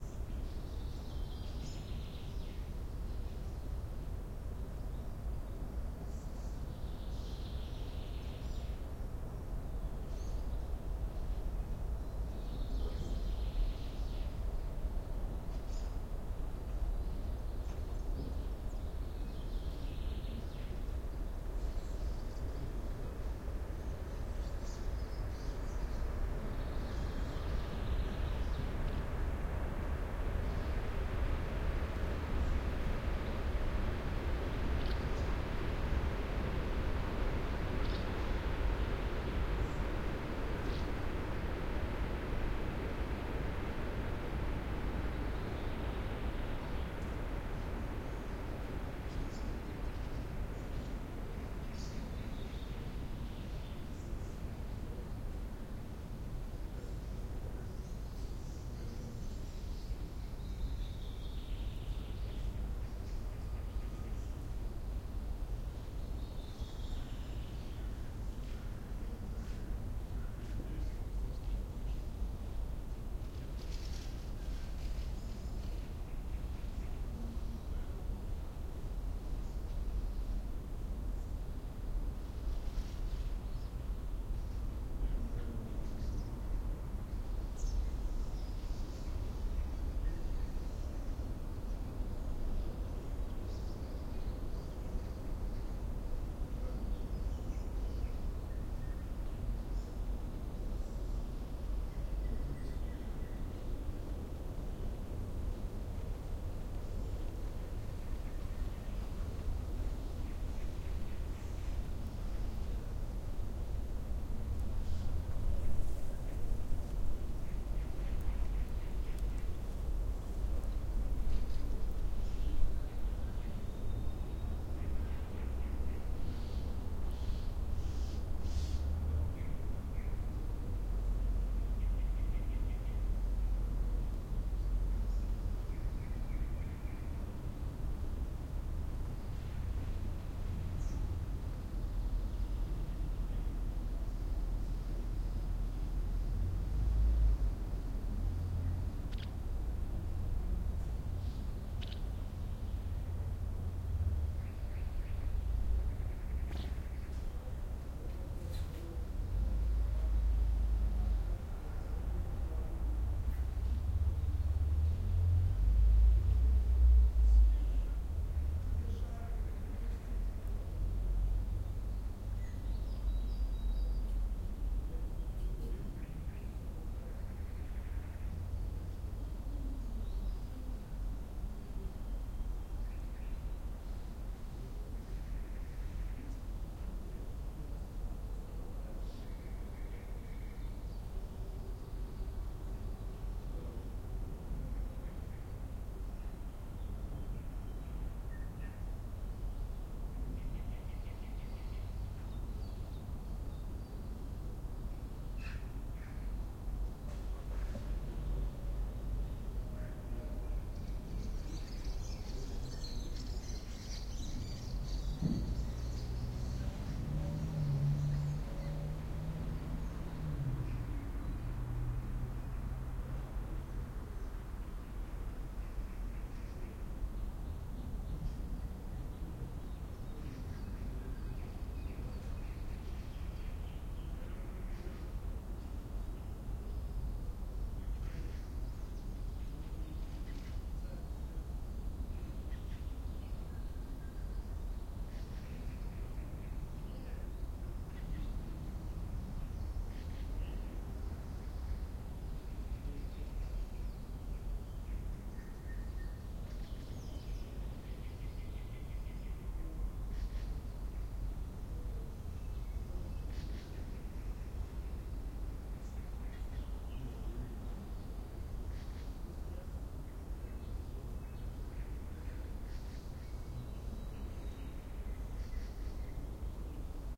Suburban ambience - Moscow region, birds, distant train pass-by, distant cars, summer OMNI mics
Suburban ambience - Moscow region, distant train pass-by, birds, distant cars, summer
Roland R-26 OMNI mics